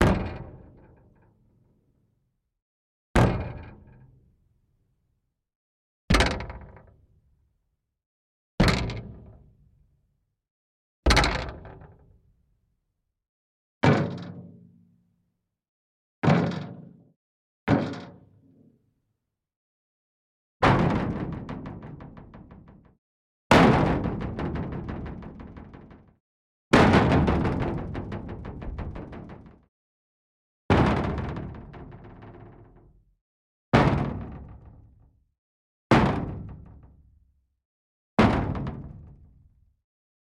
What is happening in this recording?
Foley Impact Metal Long Mono
Impact on Metal, moderate (x8) // Important/long (x3) // Important/short (x4).
Gear: AKG C411